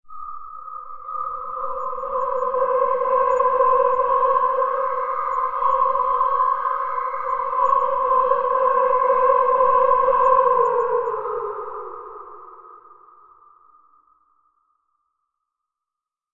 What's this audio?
A sci-fi-inspired soundscape. I hope you like it!
If you want, you can always buy me a coffee. Thanks!